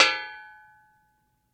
Chair-Folding Chair-Metal-Back Hit-07
The sound of a metal folding chair's back being flicked with a finger.
Impact, Hit, tink, bang